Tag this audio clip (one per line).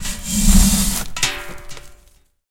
concrete
can
iron
litter
basement
metallic
trash
large
trashcan
bin
dumping
trashbin
room
metal